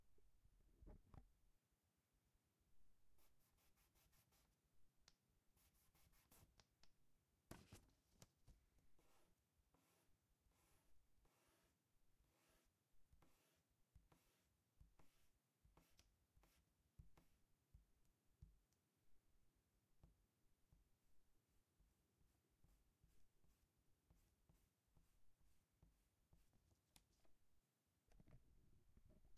STE-001 eraser
eraser is wiping the pencil line on the paper